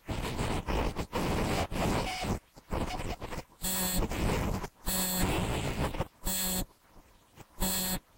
Whenever I use my USB stick, my computer always makes an awful noise. I decided to record it and see if any of you guys can make anything out of it.
To me it sounds like some sort of aliens or robots talking to each other.
The only editing done was noise removal to get rid of the fuzziness and amplification to make it a little bit louder.